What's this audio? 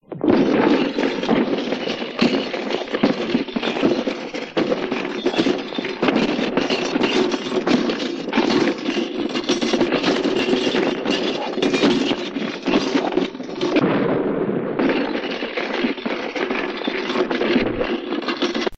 Breaking done with parts taken from broken radios and other electronics, c-casette recording from 1985, explosion at end, done with aforementioned breaking and by using thunder sound as a explosion. Used audacity noise removal to remove noise.
artificial, breaking, smashing